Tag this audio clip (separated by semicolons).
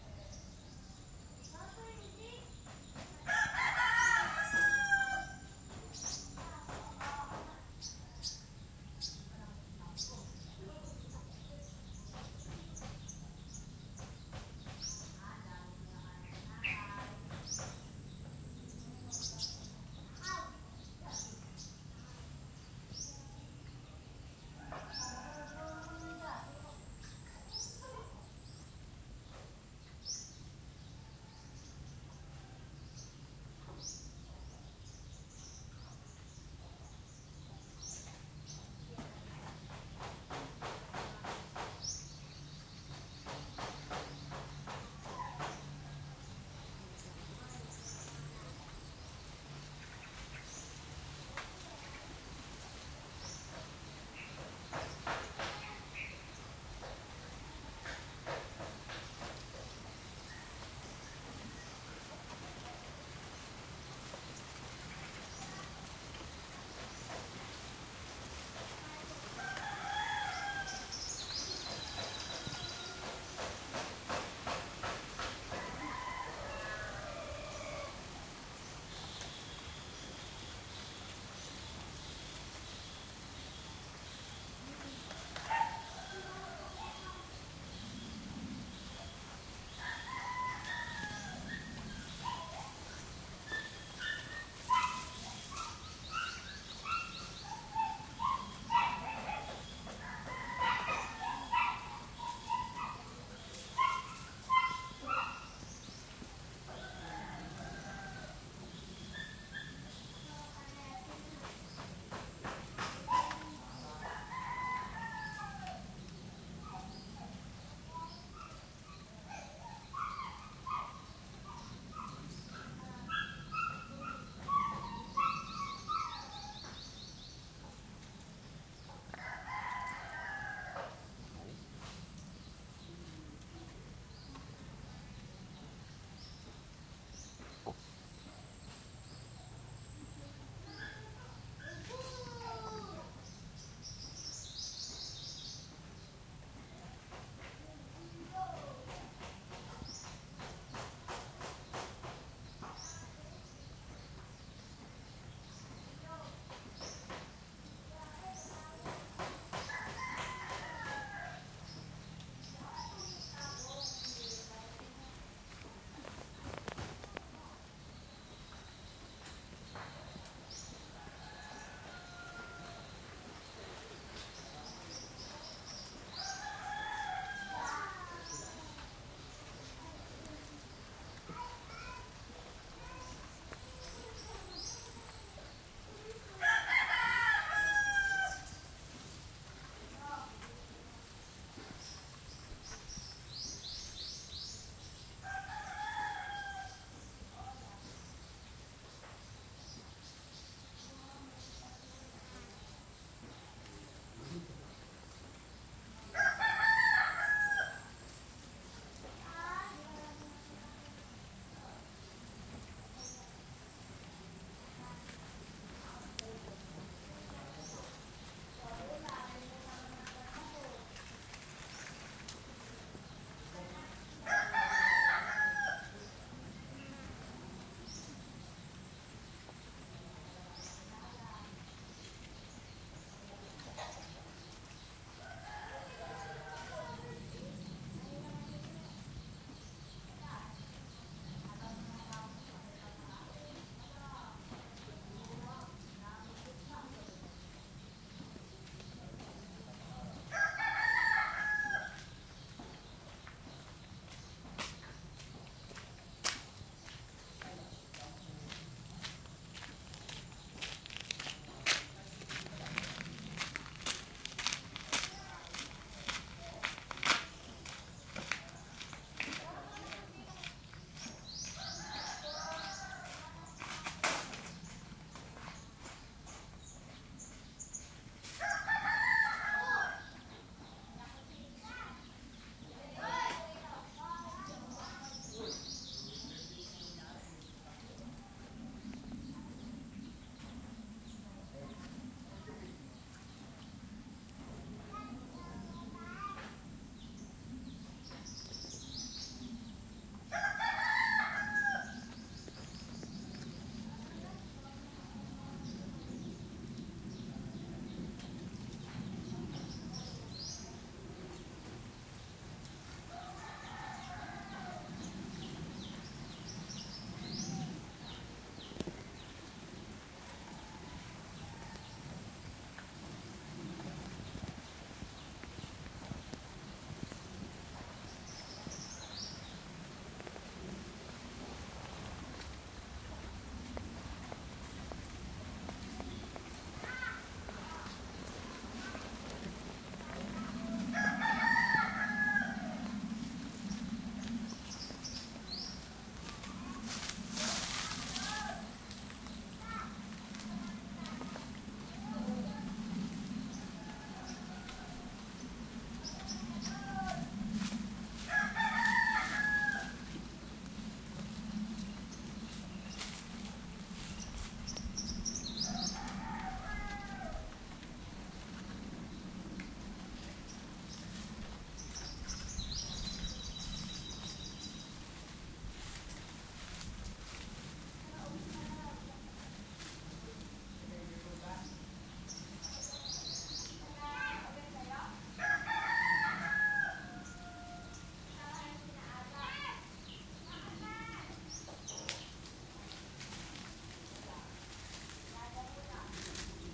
field-recording; birds; ambience; roosters; Philippines; countryside; Oriental-Mindoro; insects; voices; People